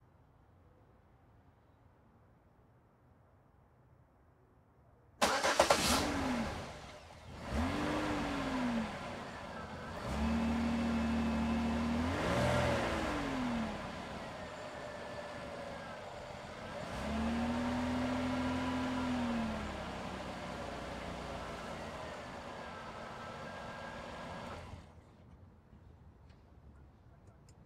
AMB Ext Auto Engine 001
This is my Chevy Malibu starting, the engine reving a couple times, then getting turned off.
Recorded with: Sanken CS-1e, Fostex FR2Le